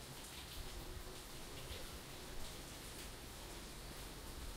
Rainy day indoor
Using a Zoom H1 to record a few samples on a rainy day.
A small set of samples was cut and collated from the raw records of the recorder.